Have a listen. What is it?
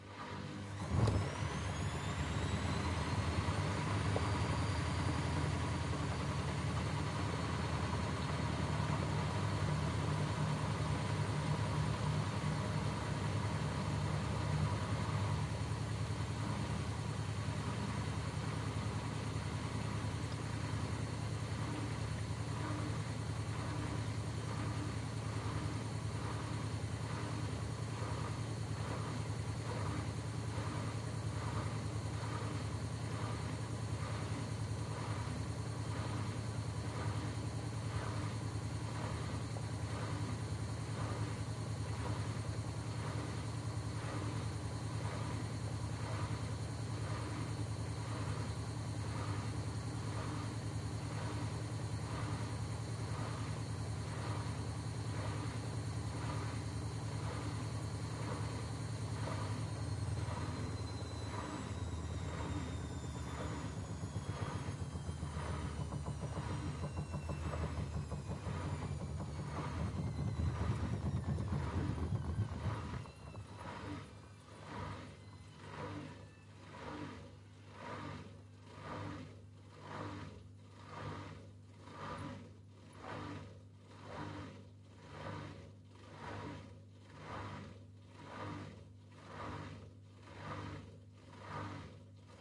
washing machine spinning medium